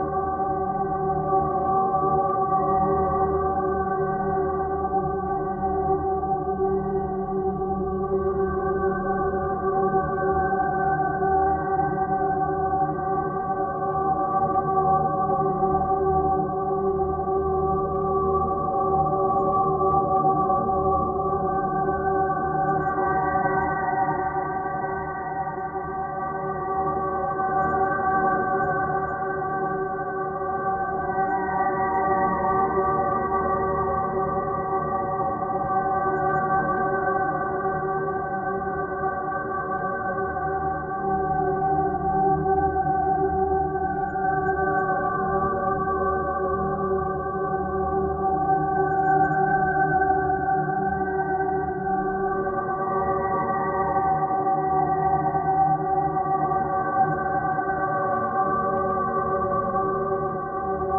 Choir Of Weeping Angels Loop
I fed some whalesong samples into some granular processing software and this eerie angelic choir sound came out...
ambience, ambient, angelic, anxious, atmosphere, choir, choral, chorus, creepy, drama, dramatic, dream, drone, eerie, fantasy, Gothic, haunted, heavenly, nightmare, scary, singing, sinister, spooky, suspense, weird, whales, whale-song, whalesong